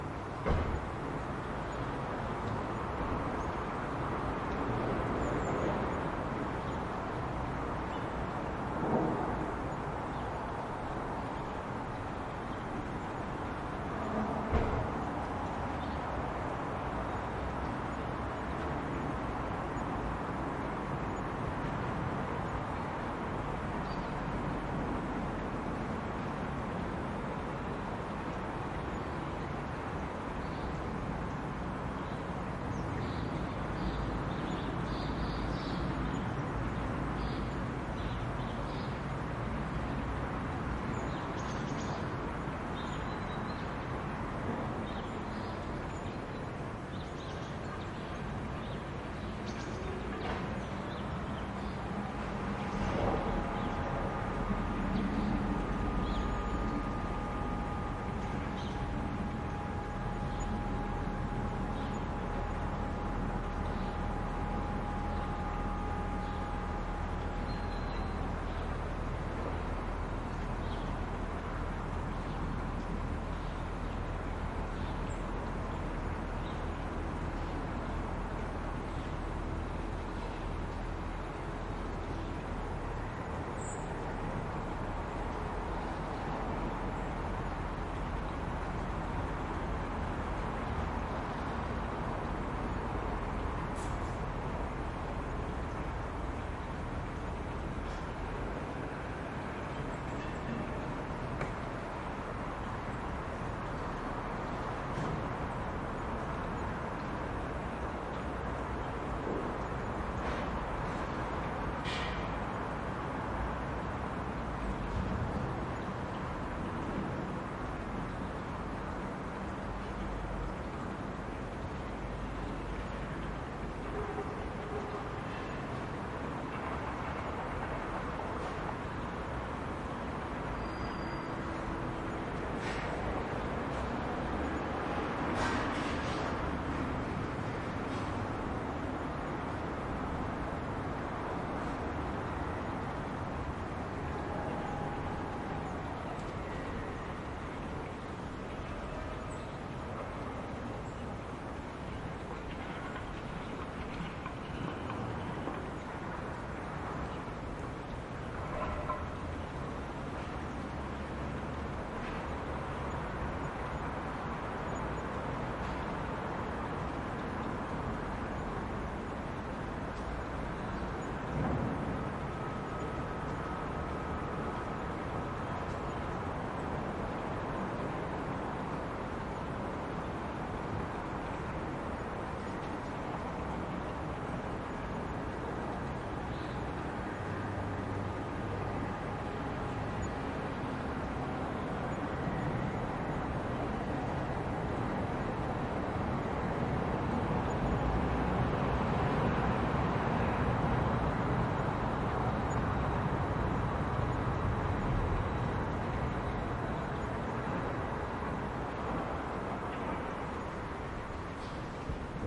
Backyard in city at noon
Backyard in a city at noon with construction site far away. You sometimes hear cars, a tram, birds, some fountain and sounds from the other buildings.
birds, site, backstreet, backyard, atmosphere, fountain, garden, tram, houses, ambiance, ambient, cars, house, car, distance, ambience, city, bird, construction, field-recording